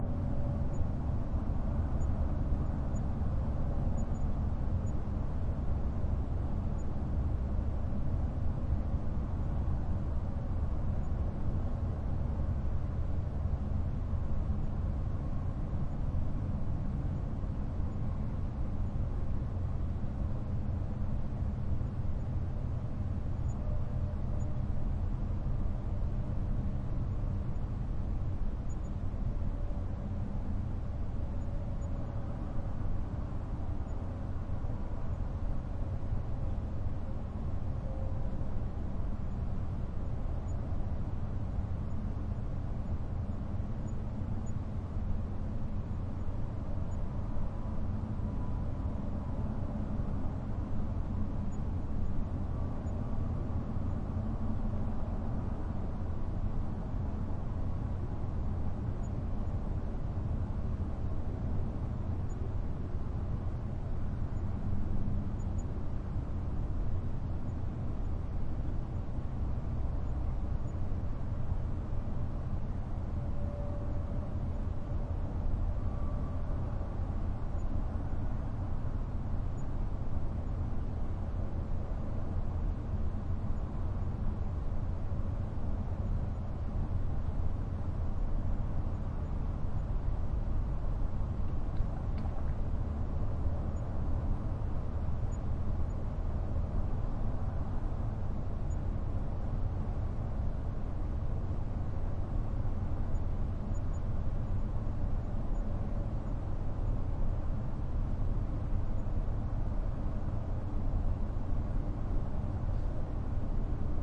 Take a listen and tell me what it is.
room tone small trailer in campground tight cramped space
campground, room, small, tone, trailer